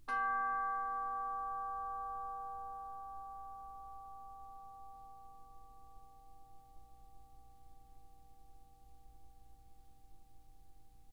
chimes g3 pp 1
Instrument: Orchestral Chimes/Tubular Bells, Chromatic- C3-F4
Note: G, Octave 1
Volume: Pianissimo (pp)
RR Var: 1
Mic Setup: 6 SM-57's: 4 in Decca Tree (side-stereo pair-side), 2 close
sample, music, bells, decca-tree